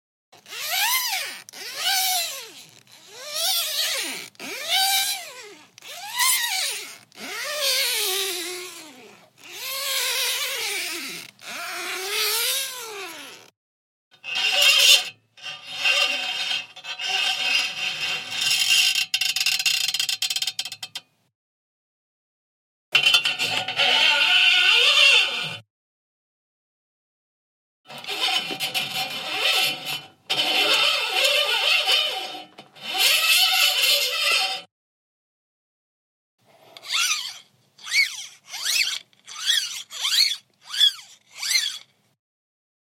aerial ropeslide, slide, sliding, shrill, glide
aerial, slide